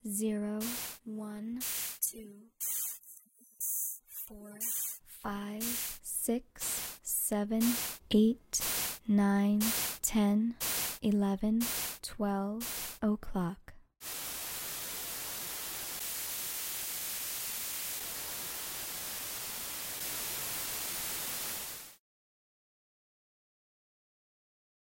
This is a synthesised 2nd order Ambisonics test file. The exchange format is: SN3D normalisation with ACN channel order.
A recorded voice says each hour clockwise in the respective positions as if the listener is located in the center of a huge horizontal clock, and looking at the location of the hour number 12. A white noise follows each spoken word, and four additional noise signals are played in four positions near to the top of the sphere.
This test audio uses sounds from the pack "Numbers 0-20" by tim.kahn
This test audio was generated using Ambiscaper by andresperezlopez